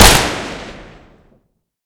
Single Gunshot 6 HP
I made the sound quite punchy also. Created with Audacity.
Gunshot, Single, Firearm